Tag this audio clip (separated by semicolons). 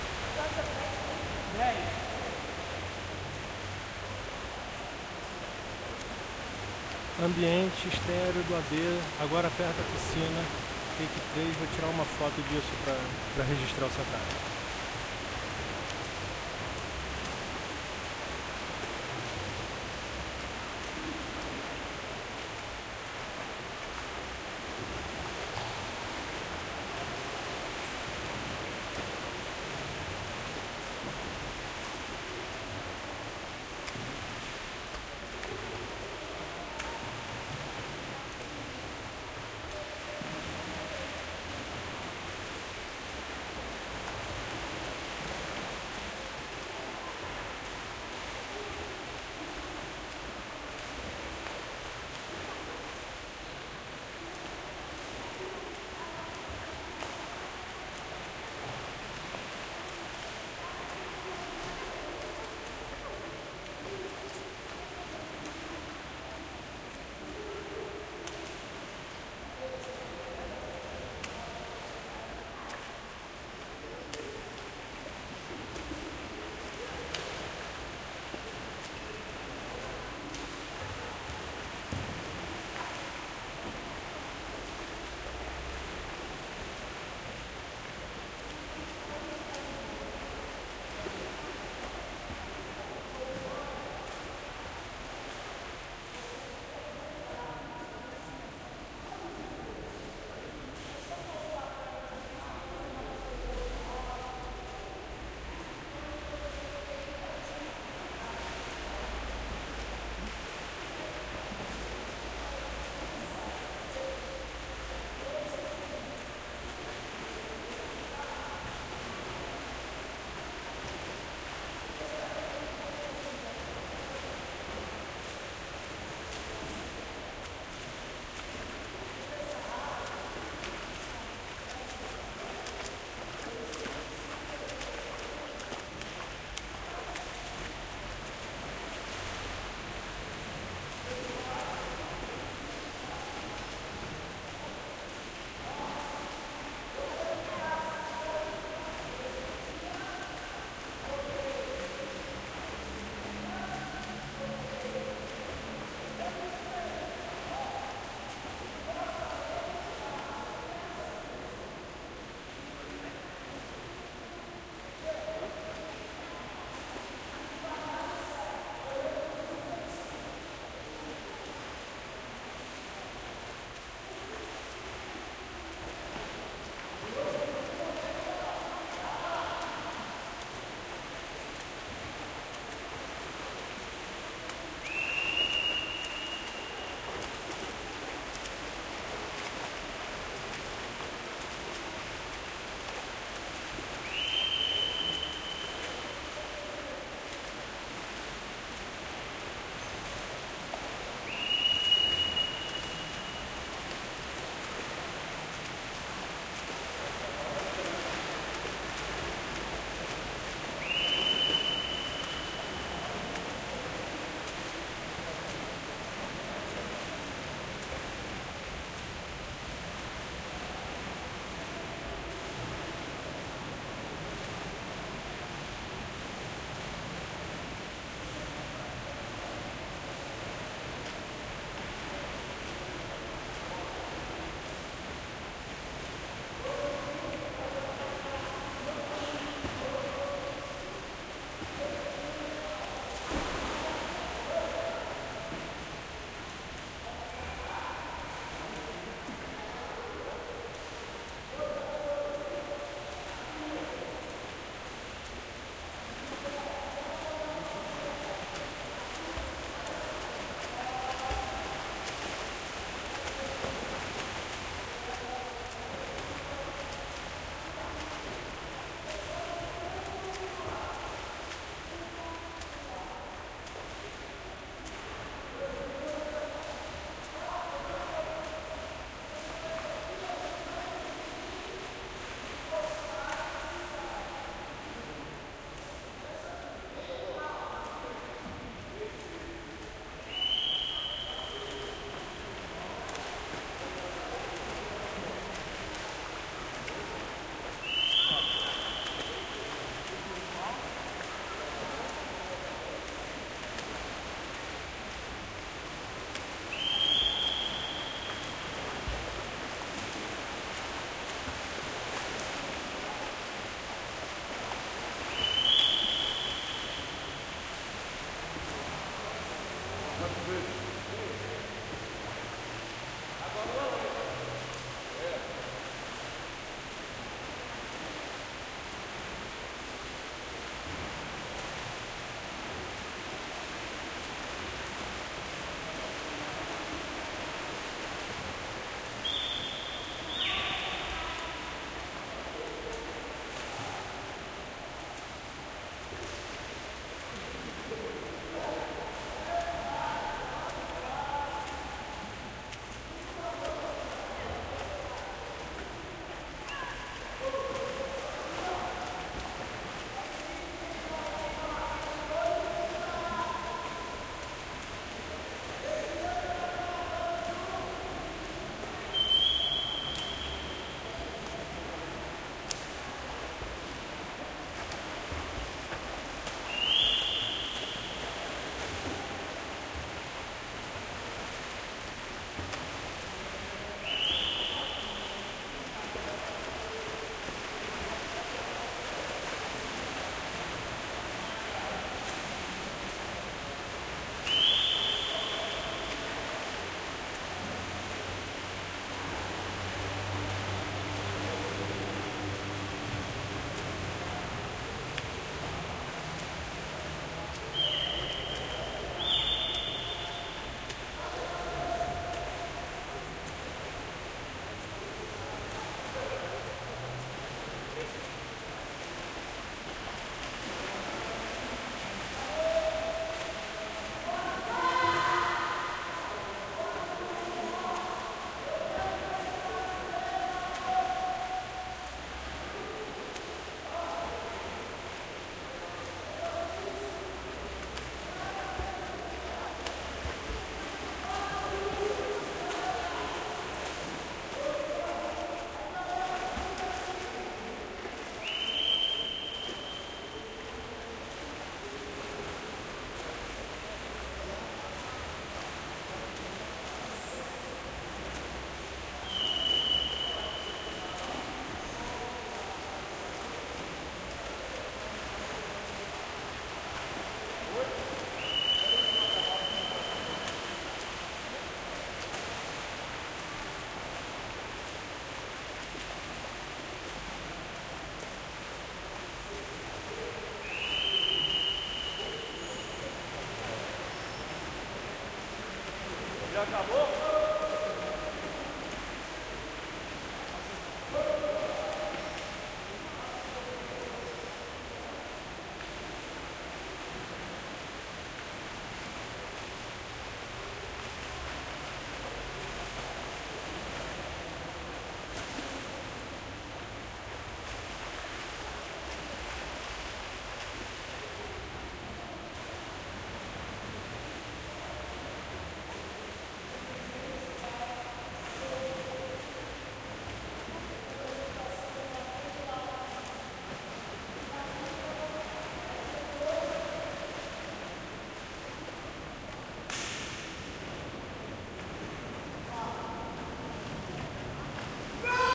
ambiance; splash; water; splashing; swim; field-recording; pool; swimming